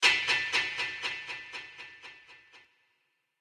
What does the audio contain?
Piano sound fading away